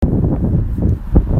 wind windy storm